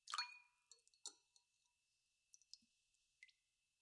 plastic item fell in a glass of water7
water, drop, fall-in-water